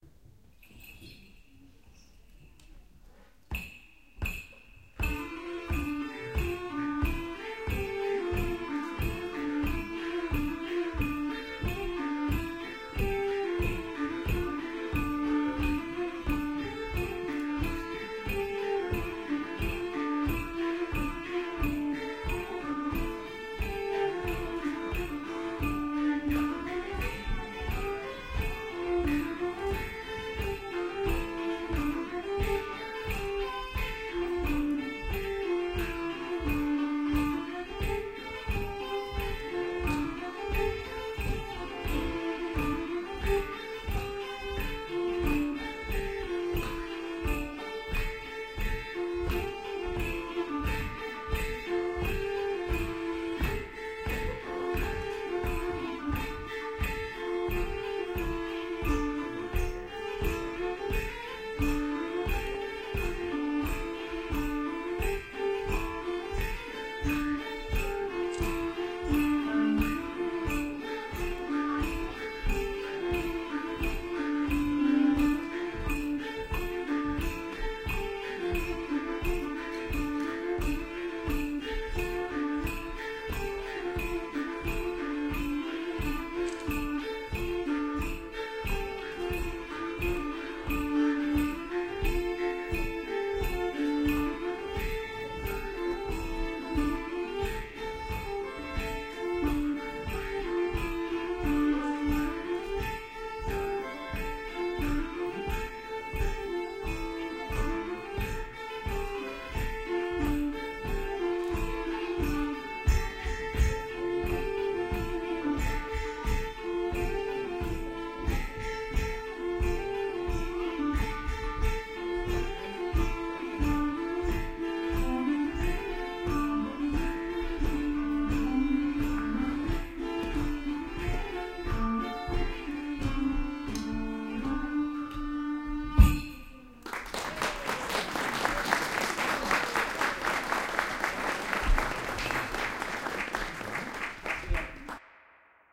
Viking music 2013-10-08 An Dro
This piece is called "An Dro"
This was recorded a fine Saturday in august, at the local viking market in Bork, Denmark. Three musicians played a little concert inside the viking church. Unfortunately i have no setlist, so i can't name the music.
Recorded with an Olympic LS-100 portable recorder, with internal mics.
Please enjoy!
bork, musicians, olympus-ls100, gigue, internal-microphone, history, music, denmark, band, gige, drums, middle-ages, jutland, field-recording, historical, bagpipe, drum, medieval, fiddle, An-Dro, instrumental, entertainment, fedel, concert, middle-age